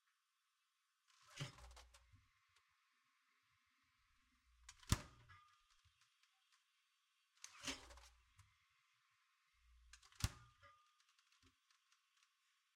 refrigerator opening
refrigerator, door, close, opening